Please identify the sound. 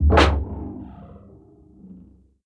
perc door
Filtered door opening sample for Sonic Pi Library. Part of the first Mehackit sample library contribution.
sound-design, percussion, sounddesign, filtered, door, sample, open, mehackit